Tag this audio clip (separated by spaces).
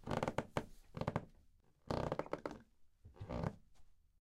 film dark tv horror evil monster creaks foley scary creaking sound squeak boards bizarre floor group creak